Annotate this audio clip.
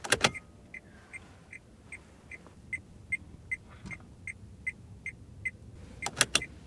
I switched on the car alarm lights. A beeping sound can be heard.
Recorded with Edirol R-1 & Sennheiser ME66.